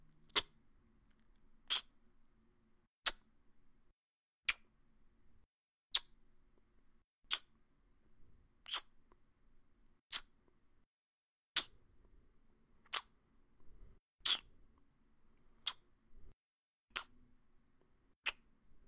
Male kisses
Male kiss sounds. Recorded with a Blue Snowball ICE microphone in Audacity.
guy, kiss, male, smooch